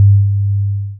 100hzSine env
part of drumkit, based on sine & noise
sine, drums, noise